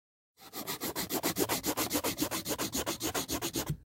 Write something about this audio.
It's the sound of a marker painting on paper